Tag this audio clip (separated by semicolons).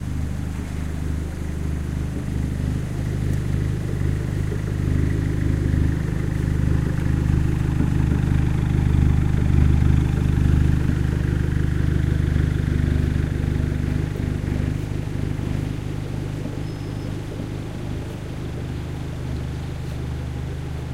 ambient-sound; road-work; truck-passing